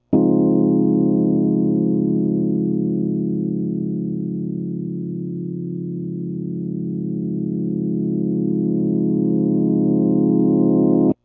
Some more Rhodes that goes forwards and backwards.
rhodes, Continuum-5, atmosphere, ambient, reverse, electric-piano